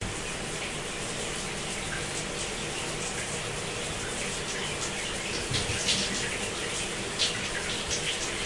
Shower water running
Water running from just outside a closed shower door
ladies, ambient, splash, running, toilet, showering, shower, bathroom, water, drip